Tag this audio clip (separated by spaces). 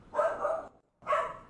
shout dog growl